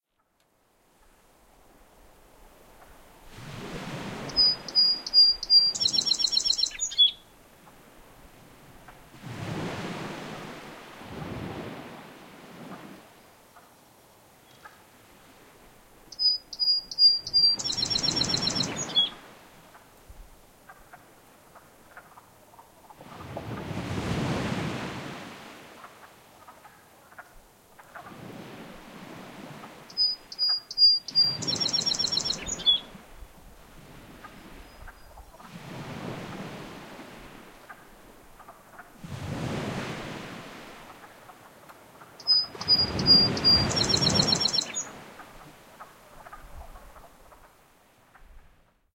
Taylor Head Nova Scotia, Bull Beach Soundscape. On Nova Scotia's Atlantic Coast. Recorded April 5th 2010, 1040hrs. Song Sparrow (Melospiza melodia) and Wood Frog (Rana sylvatica) can both be heard. Fostex FR2LE and Rode NT4 mic.
soundscape, head, scotia, taylor, beach, nova, bull